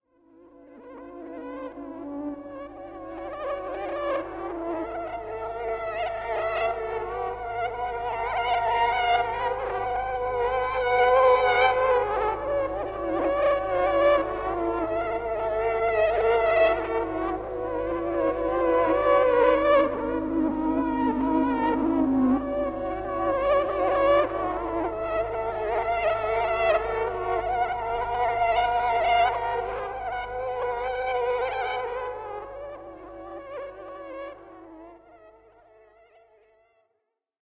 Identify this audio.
Warped Melody
Reversed melody heavily processed on cassette tape.
analog, cassette, electronic, melody, music, old, ominous, retro, strange, synth, tune, warp, warped, weird